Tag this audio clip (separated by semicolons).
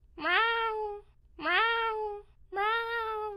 meow; animal